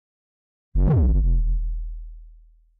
HK sawnOD Fsharp
I made this in max/mxp.
drums, kick, overdriven, one-shot, hit, oneshot, distorted, kick-drum, drum, F-sharp, sample, bass-drum, bass, bassdrum, percussion, saw